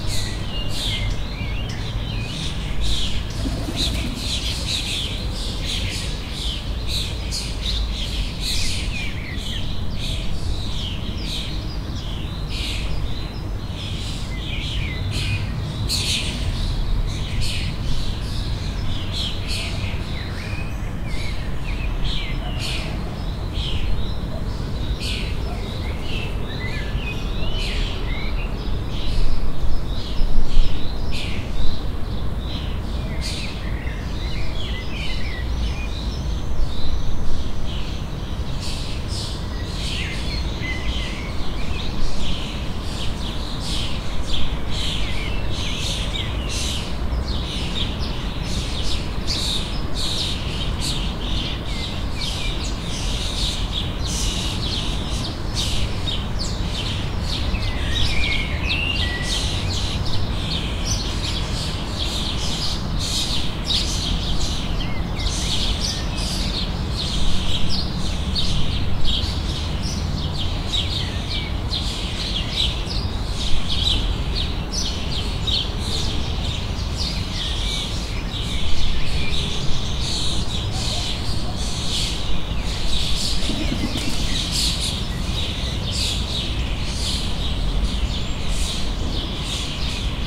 SE ATMO birds distant city
recording made from the window
mic: AKG Perception 150